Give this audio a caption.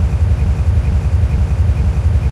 A loop made from traffic sounds recorded at the Broadway tunnel San Francisco ca.
16-bit, electronic, loop, machine, stereo